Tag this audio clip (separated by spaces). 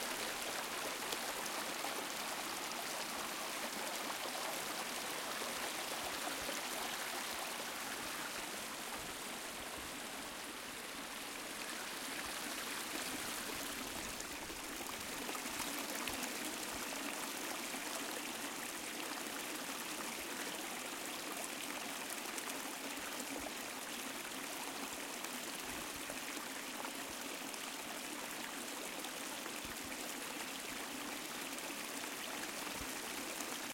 nature creek ambiance ambient field river stream recording relaxing trickle babbling field-recording water flowing sound flow brook